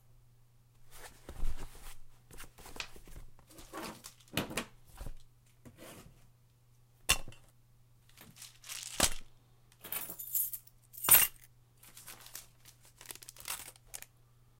Someone putting stuff into a handbag.
handbag, packing, stuff